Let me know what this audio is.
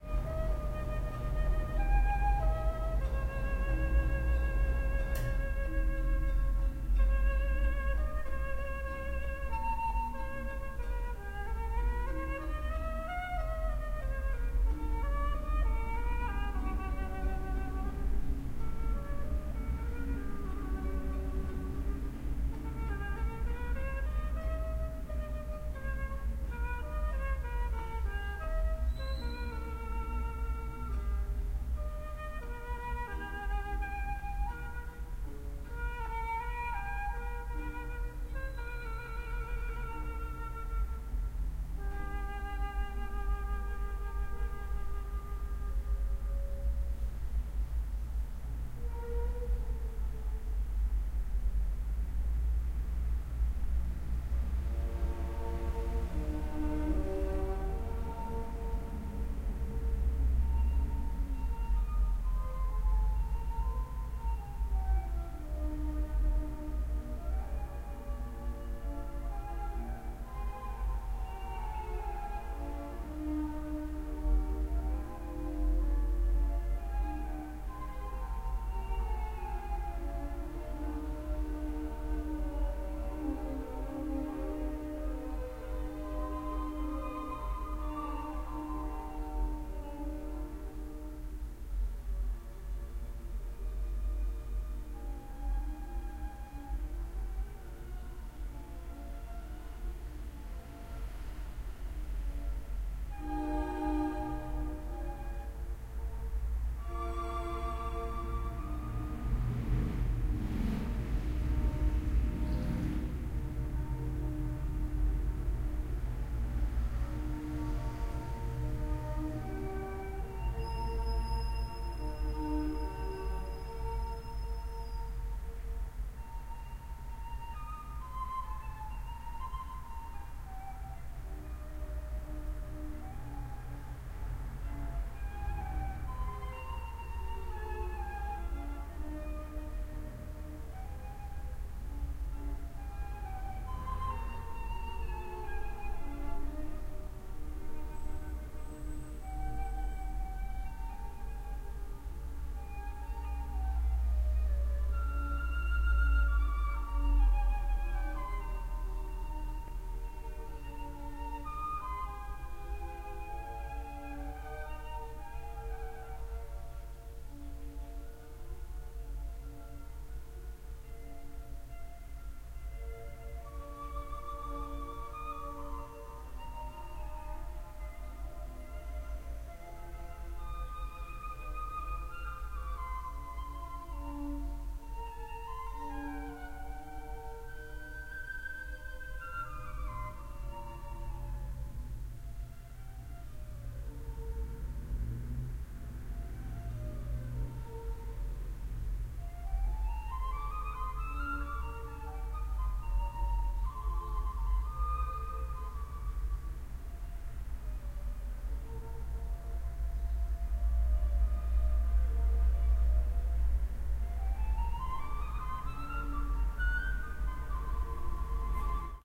0102 Restroom music
Ambience music in a public restroom. Fan in the background for some moments.
20120118
field-recording, korea, music, restroom, seoul